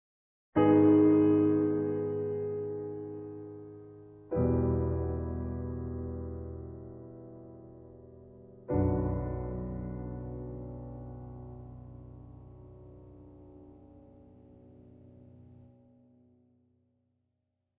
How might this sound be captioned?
A very sad phrase in the style of Schubert, Liszt or contemporaries.